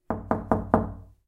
Knocking Door
Knock on the door. Who's there?